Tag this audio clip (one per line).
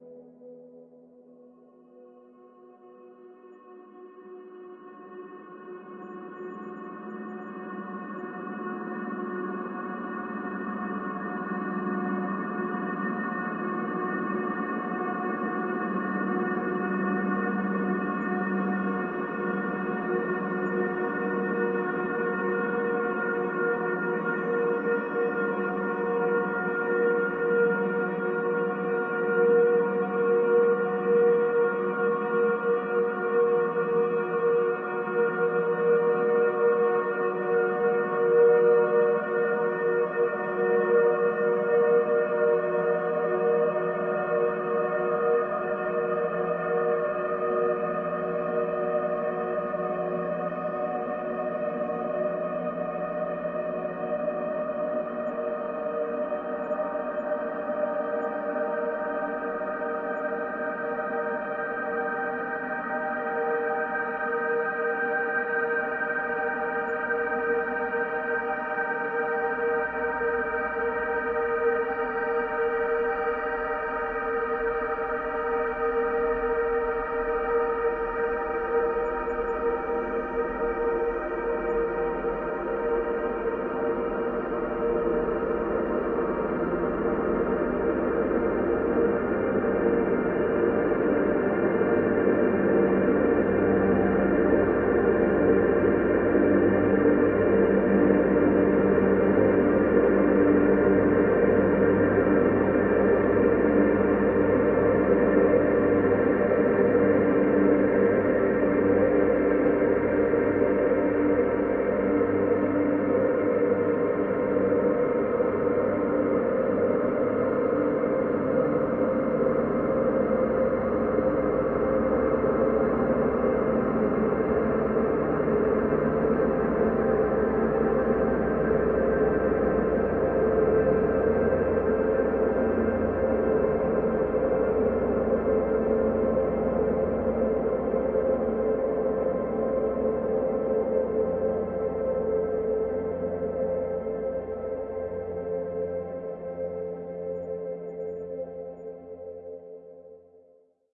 evolving
drone
soundscape
ambient
pad
artificial
multisample